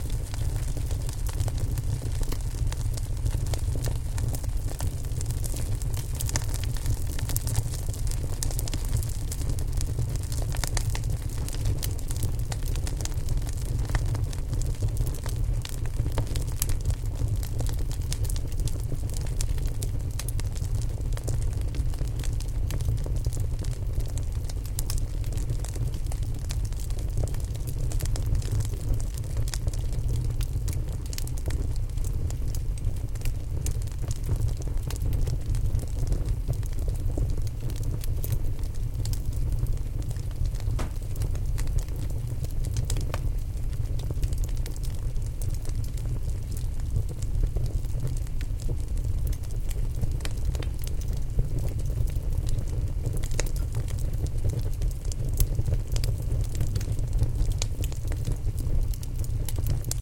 Just a composition of three recordings of the same fireplace at different phases.
binaural, field-recording, Fireplace